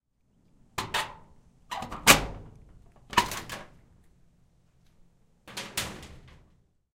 The sound of the door of a small metal shed being opened.
Recorded using a Zoom H6 XY module.